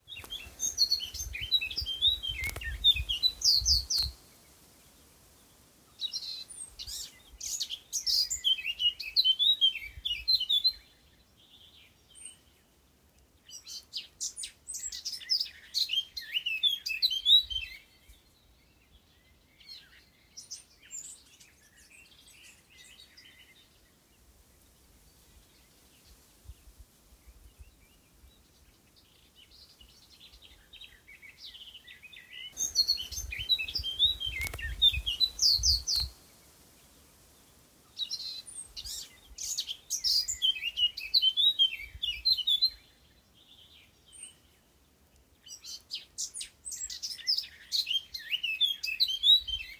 Ornitologia Montserrat 01
Bird songs on the Montserrat hillside in the Cove Salnitre on the 12th of July July 2020 recorded with the mobile recorder
field-recording, nature, birds, birdsong, morning, bird, singing, whistles, spring, forest